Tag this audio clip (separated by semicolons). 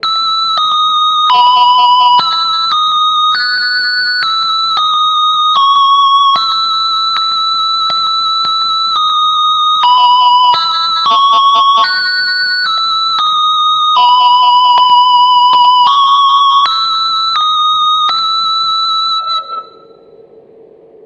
fx; gt6; guitar; melody; multi; synth